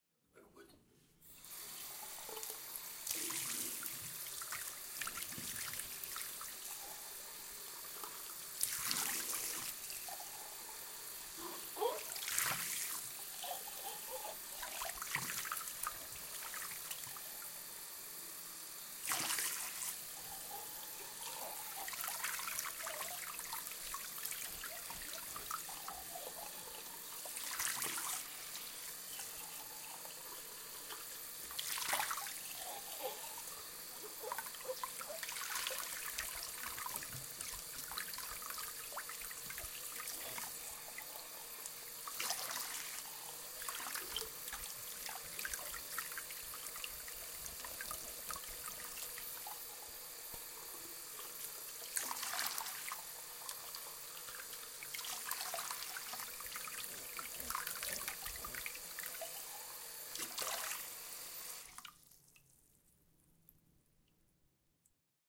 Washing dishes in sink